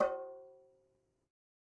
Metal Timbale left open 021
real, home, kit, trash, god, record, conga, garage